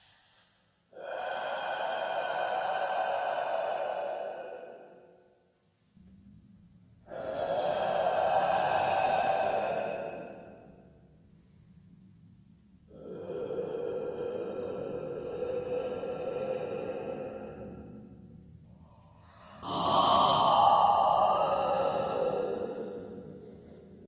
sigh breath ghost slow clean version-5 (215-v2) Han van Bakel
Abraçant (Embrace)
Molt- (Multi-)
Talenti (Talented (persons/beings) )
Riu (Flowing)
Agrupació (Bundling)
Radiant (Glow/Radiate) toGETHER
AMTRAR
ghost, sigh, breathing, van, han, beneden-leeuwen, breath, slow